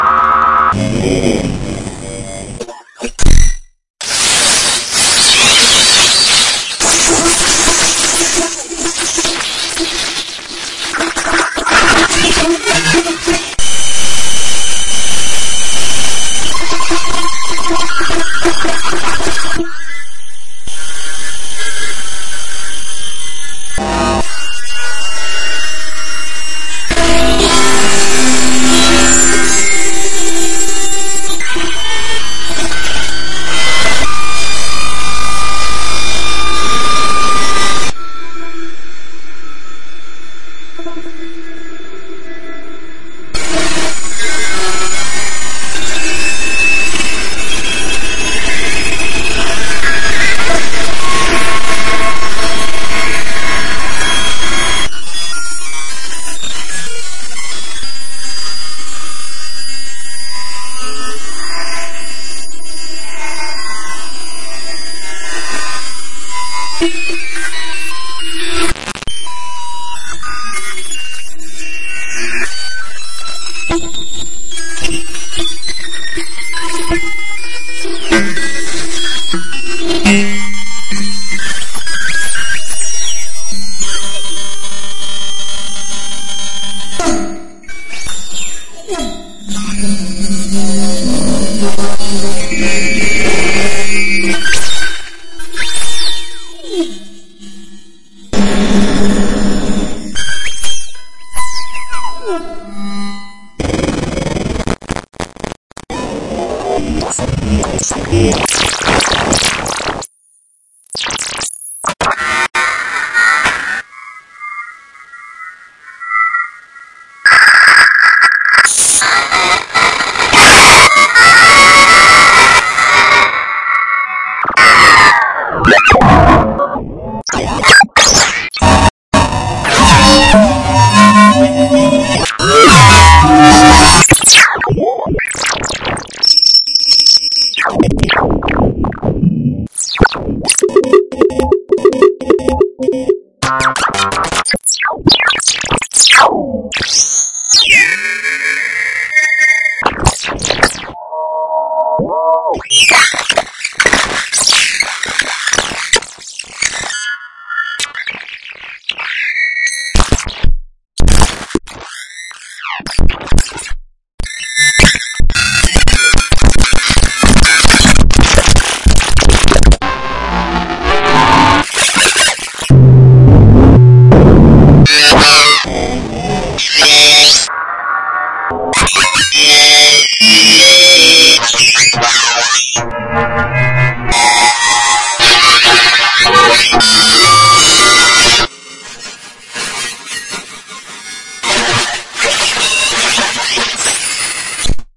Modular Jamb # 2
Some cafe ambience severely altered with Blok Modular FX. Was originally a long jam cut up into a bunch of individual files but I figured searching through them would be a pain so I merged them back into one file.
I don't want to divulge too much of what the patch looked like but it random note values with each keyboard press which were then ran through a waveshaper. Later on I add some reverb and use various things to alter the panning for some strange stereo effects
Edit: You might want to use a dc eliminator on the samples because the waveshaper creates some DC offset (basically useless low/zero frequency noise for those not in the know). But maybe it has some aesthetic value for you to keep it intact? You decide
blok, digital, idm, modular, noise, synth, synthesized